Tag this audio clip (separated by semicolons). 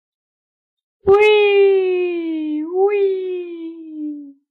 recording; audio